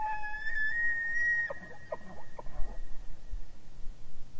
A bull elk bugling at night during the fall rut in Evergreen, Colorado
Deer, Bugle, Rut, Bull, Fall